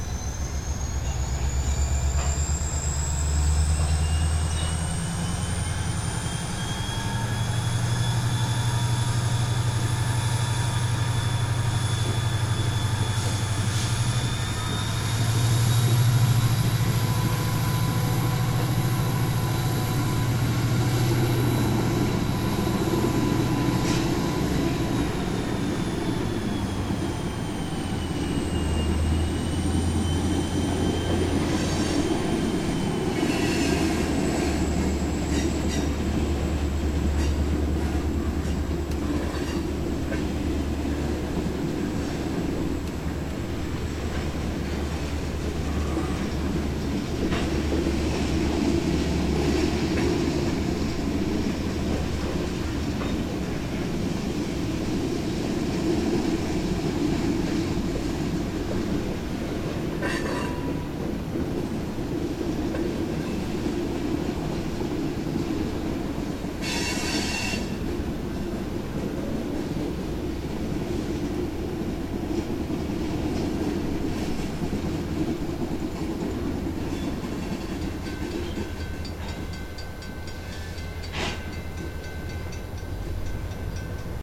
Diesel Locomotive cranking it up in the Oakland Rail Yards.
locomotive, Train